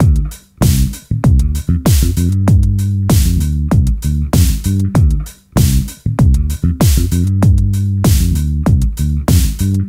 PHAT Bass&DrumGroove Dm 9
My “PHATT” Bass&Drum; Grooves
Drums Made with my Roland JDXI, Bass With My Yamaha Bass